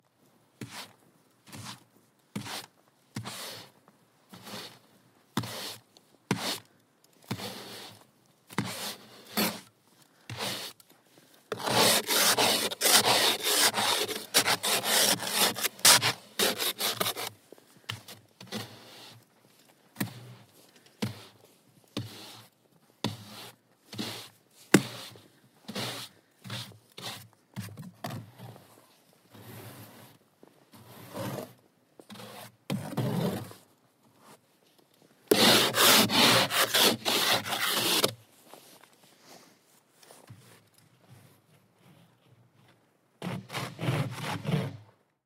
Icy car
Removing ice from a car. Recorded with a Zoom H1.